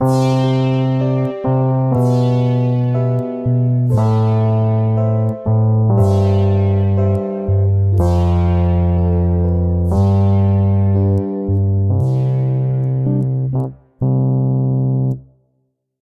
FakeRhodes pop (Cmajor-120bpm)
rhodes, piano, loop, keys, keybord, pop, 120bpm, loopable